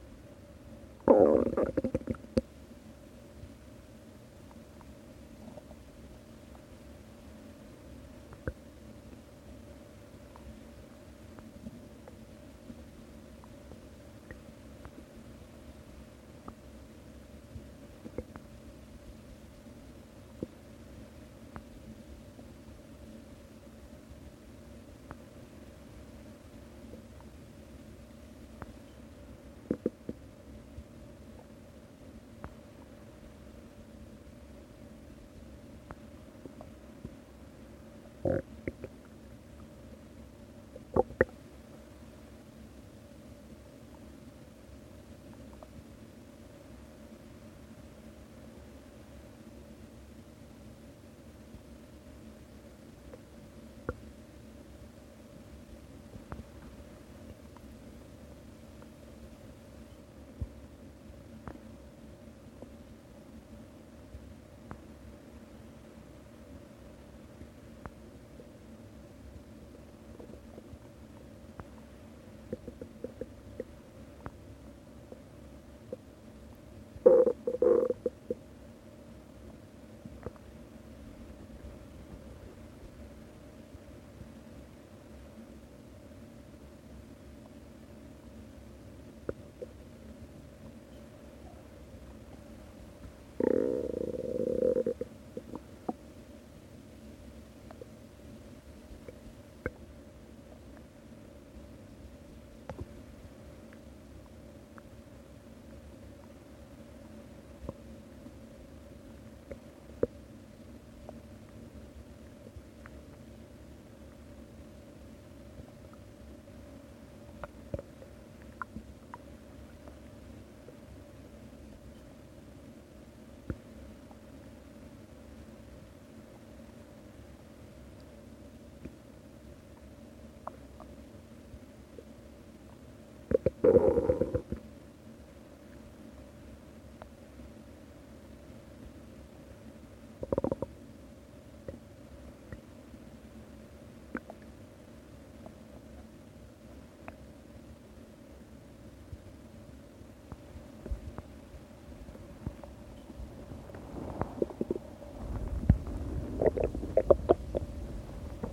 Quiet bubbling and groaning on an empty stomach.
body, bubble, digestion, groan, gurgle, guts, human, intestines, liquid, stomach